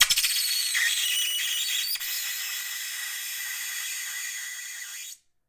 Bowed Styrofoam 7
Polystyrene foam bowed with a well-rosined violin bow. Recorded in mono with a Neumann KM 184 small-diaphragm cardioid microphone from 5-10 inches away from the point of contact between the bow and the styrofoam.
polymer, howl, screech, polystyrene, high-frequency, noise, styrofoam, plastic, bow, harsh, synthetic, harsh-noise, bowed